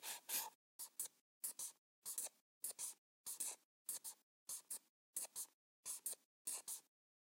marker heen en weer
pen, pencil, stift, draw, drawing, marker